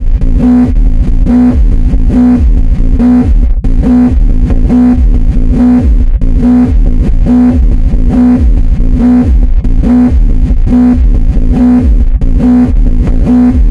A hectic noisy bass that didn't make it in my current project. 8 bars, yours to use now.